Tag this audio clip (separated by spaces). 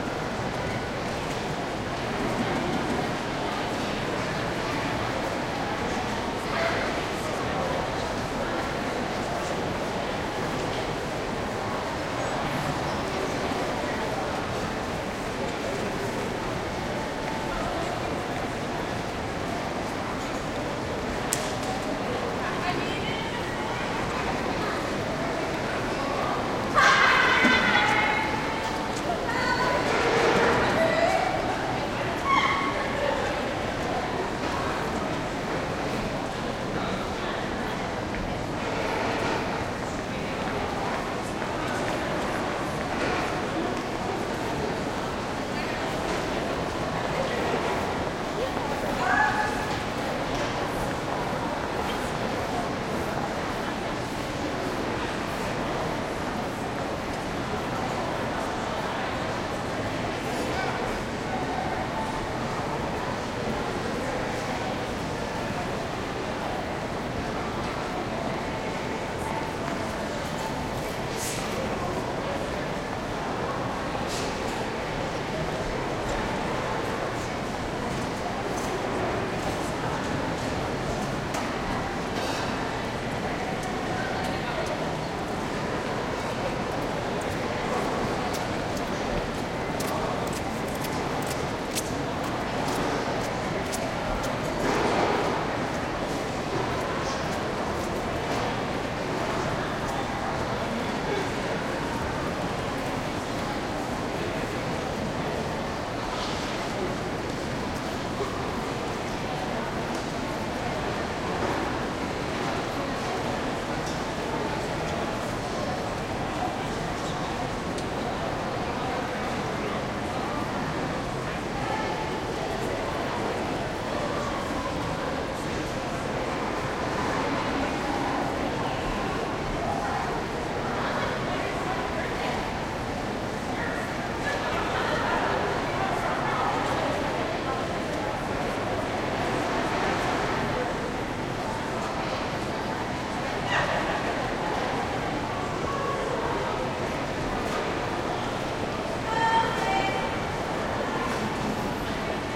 Canada Montreal space mall center shopping heavy people steps echo open